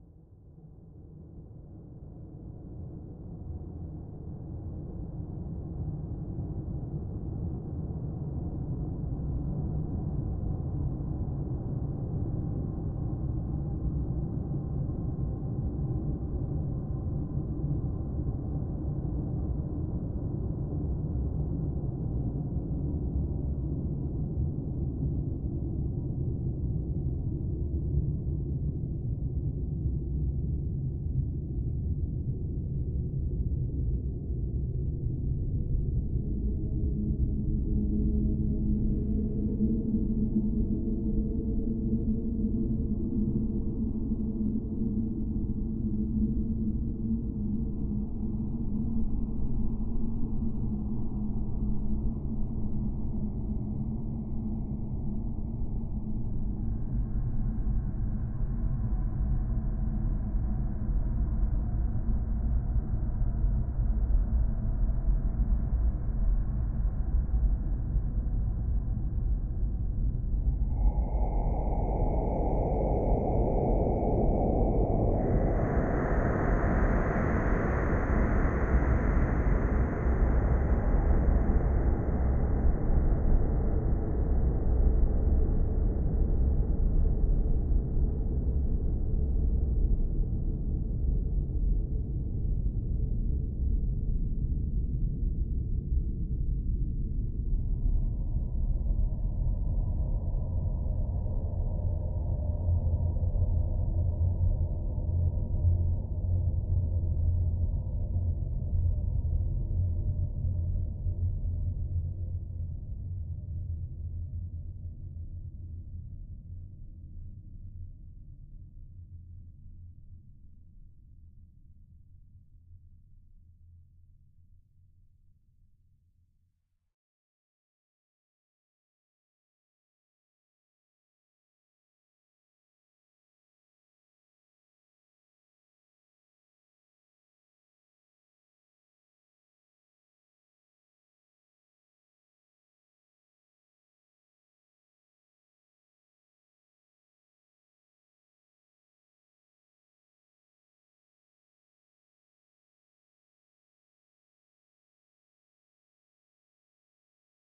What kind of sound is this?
Dark Emptiness 019
cinematic, game, atmo, soundscapes, ambient, oscuro, dark, experimental, tenebroso, effects, flims, sound